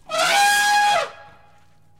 Elephant Trumpets Growls
sound of elephant